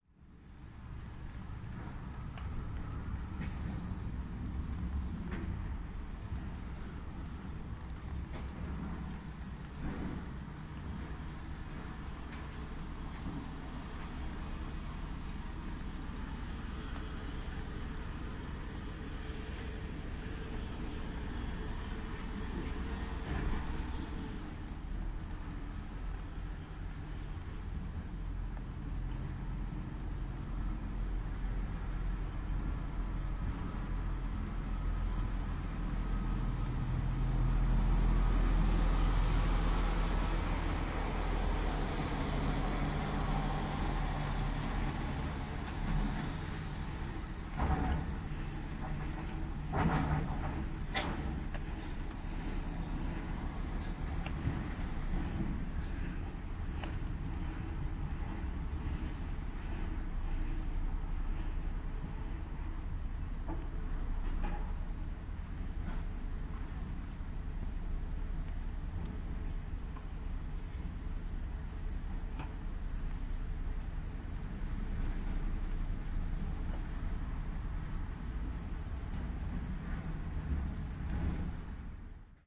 110817-ambience daches logistic in kolding2
17.08.2011: eighteenth day of ethnographic research about truck drivers culture. Kolding in Denmark. Ambience of the car park in front the logistic company. Passing by cars and trucks, wind swoosh, some rattling.
field-recording, ambience, rattle, wind, flap, trucks, cars